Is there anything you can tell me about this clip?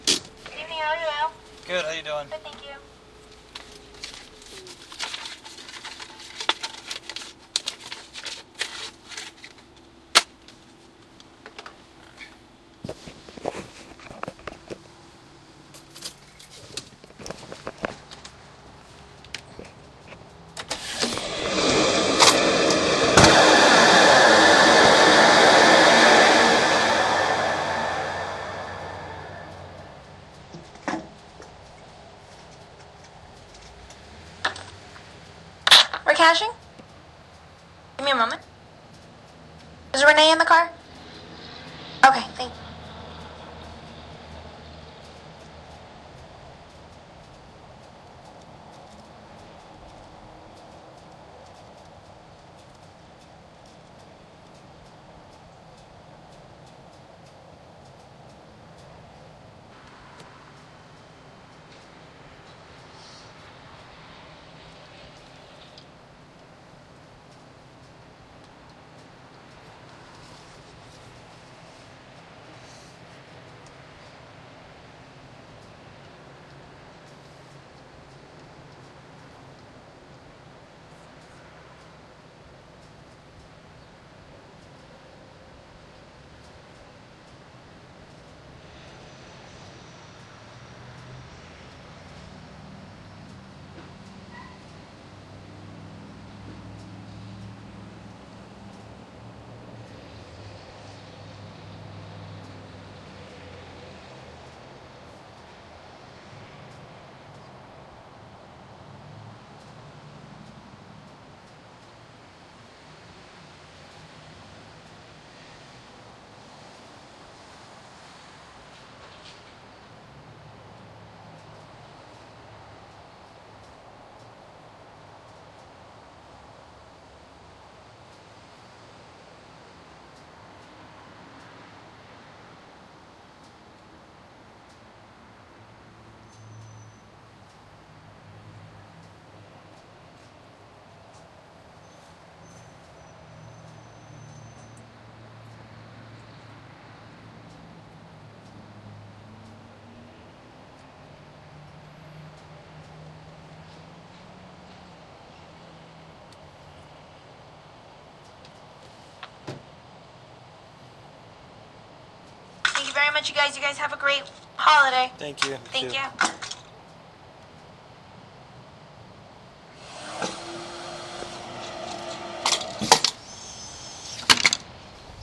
Some files were normalized and some have bass frequencies rolled off due to abnormal wind noise.
field-recording, pneumatic, stereo, tube